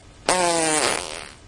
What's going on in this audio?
fart poot gas flatulence flatulation explosion noise
explosion, fart, flatulation, flatulence, gas, noise, poot